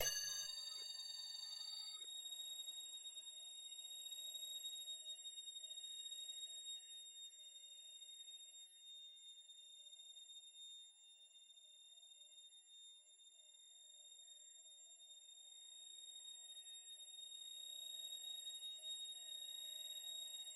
op-9 thriller
High-frequency sine sounds modified by LFOs
effects, fm, pads, sfx, sine, sinewaves, spectral, synthesis, thriller